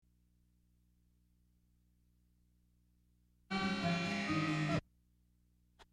A short blast in a moment of silence. three descending notes ending in a clutch.
Delay used: Boss DD-5